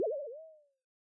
A mini flying saucer flying at 5.1 space ...
CⓇEATED by ΟptronTeam